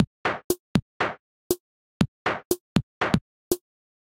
LD 1 - Electro Industrial
Good day.
Minimal Electro/Breaks/Techno loop. Without compression and FX.
Support project using
breaks, dnb, drums, electro, hip-hop, house, jazz, loops, techno